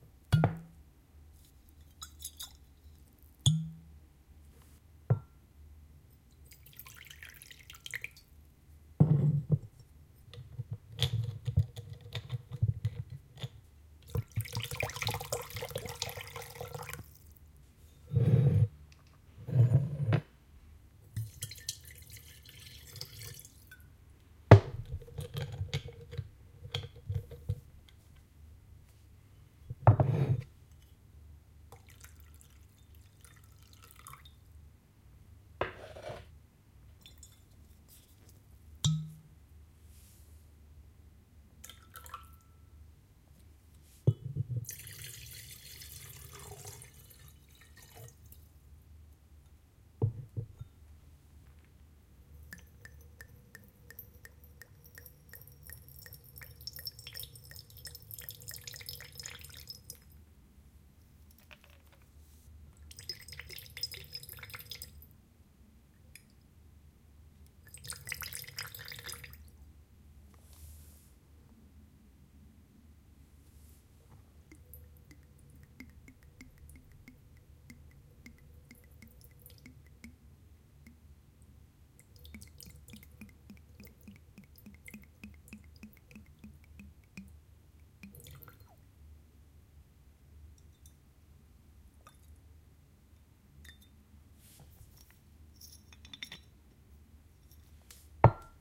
Pouring water in and out of different containers
Water is being poured in and out of assorted ceramic and glass bowls, jugs or bottles. I left a bit of the container's own noise in, such as cork bottles plopping or the filled container being slid over a wooden table, for all your bartenders needs :P A lovely chuckling bottle is somewhere by the end.
adpp, bowl, ceramic, glass, liquid, Pour, pouring, splash, water